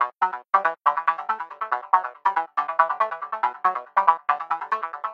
TR LOOP 0416
loop psy psy-trance psytrance trance goatrance goa-trance goa
goa, goa-trance, psy, trance, psytrance, goatrance, psy-trance, loop